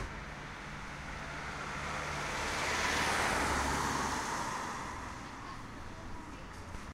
Car passing by